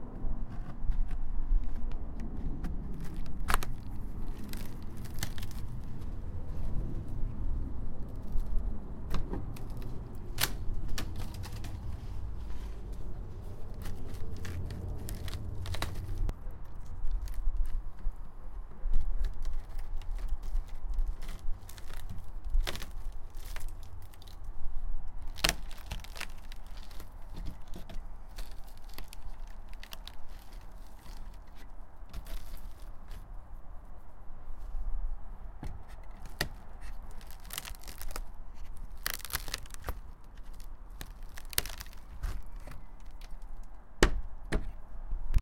Tearing rotten wood 2
This is me in the garden tearing away rotten wood from my fence before fitting in new wood pieces.
Recorded with a Zoom H1.
breaking, cracking, creaking, destroying, rotten, rotten-wood, snapping, squeaking, tearing, wood